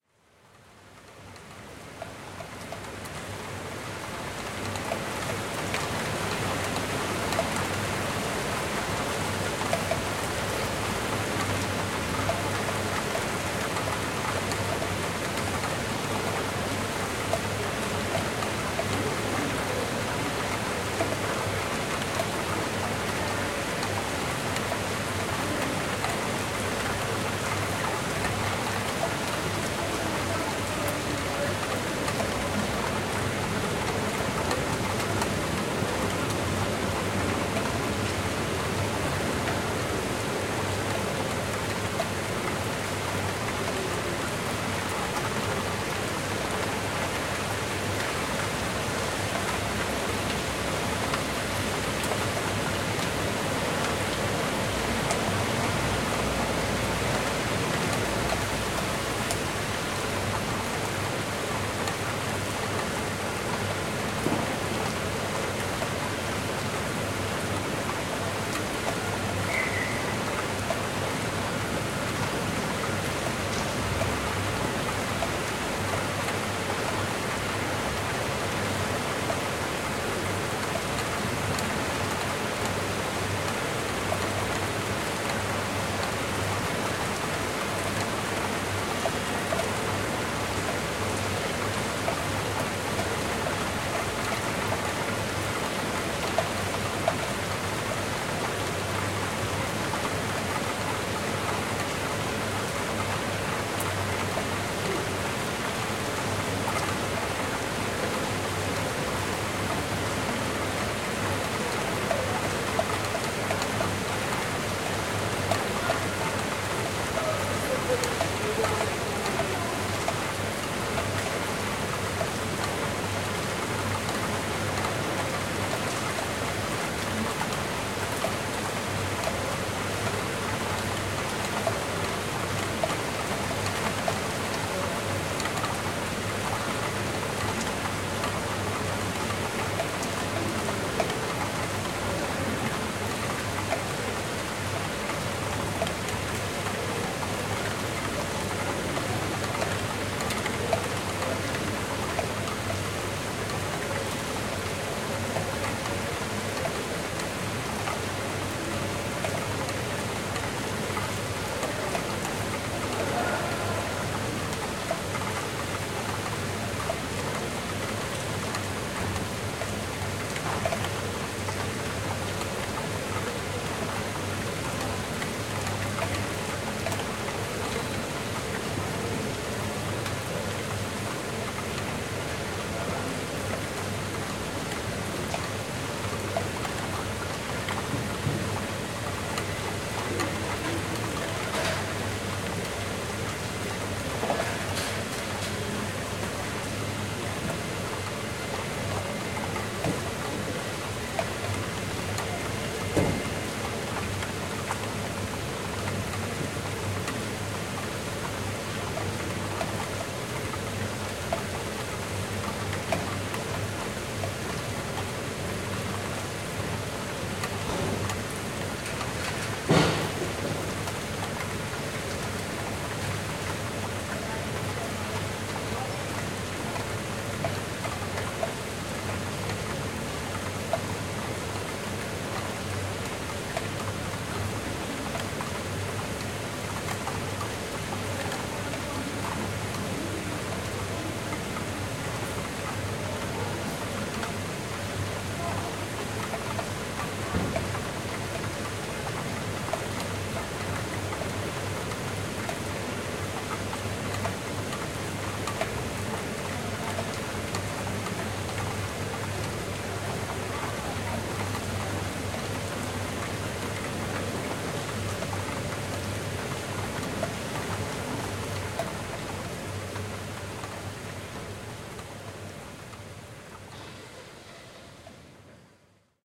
rain on wroclawska street 170718
17.07.2018: havy rain. Wroclawska street in the center of Poznan (Poland). No processing. Recorder zoom h4n.